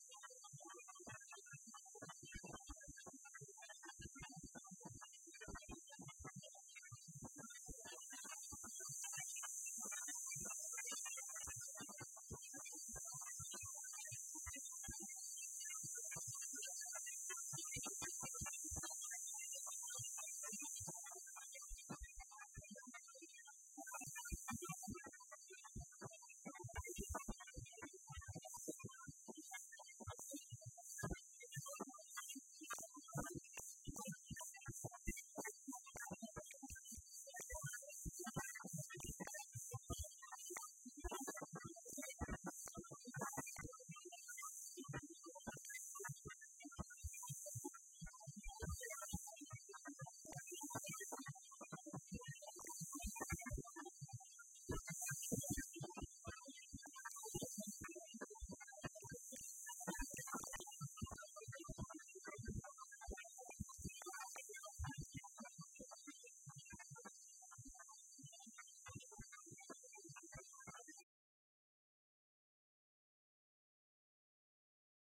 Recording of an empty radio frequency.